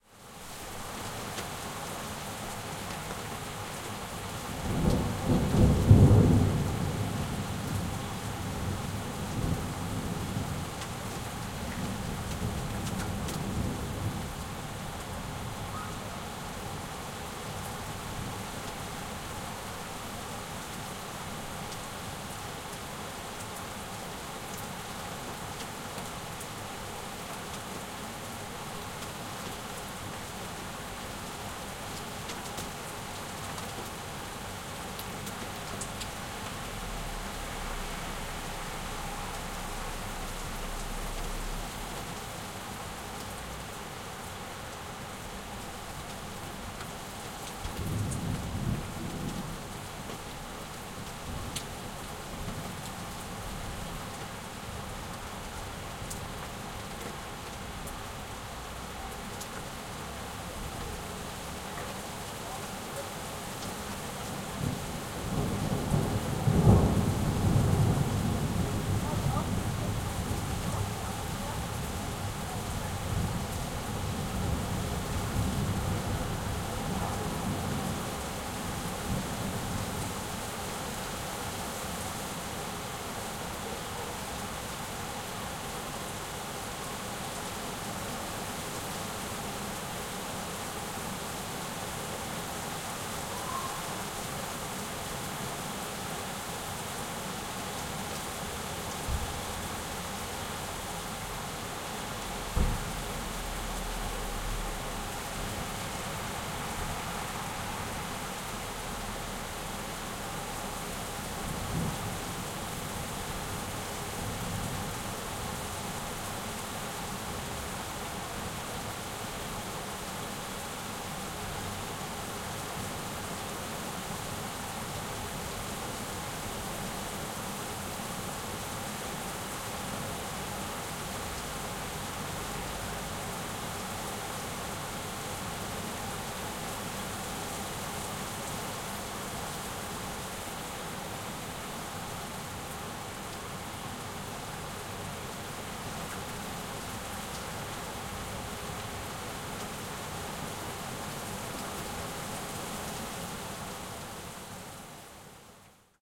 Rain and thunder recorded next to an open window. The right channel is louder than the left.